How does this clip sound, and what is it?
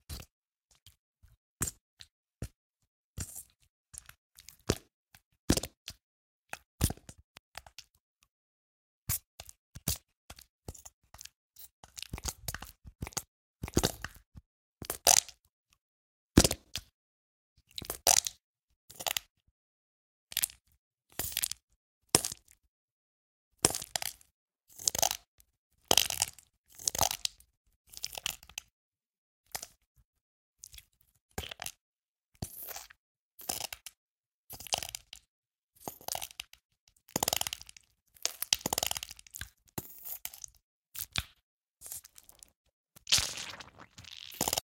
Messy StickyMudNStuff
This is part of the Wet Sticky Bubbly sound pack. The sounds all have a noticeable wet component, from clear and bubbly to dark and sticky. Listen, download and slice it to isolate the proper sound snippet for your project.
clay, gross, mud, sticky